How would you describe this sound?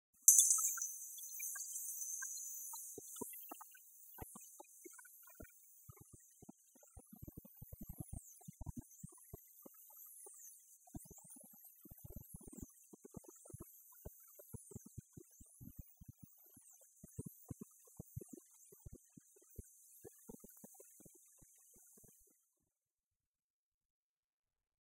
REmixed gong
This is a remix of 249542. Just ran it through a FX evaluator, adjusted the dynamics and remixed channels.